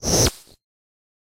Processed a vacuum sucking noise and added ringshifter for variations.